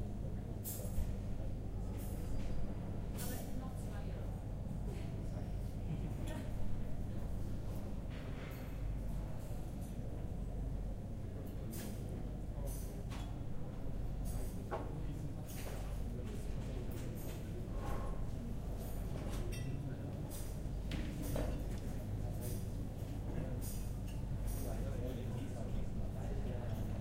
P6 ZKM cafeteria excerpt 13
ZKM Karlsruhe Indoor Bistro